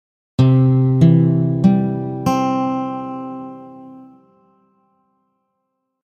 Guitar Arpeggio
Simply a C Major plucked arpeggio on a guitar.
Classical, Guitar, Plucked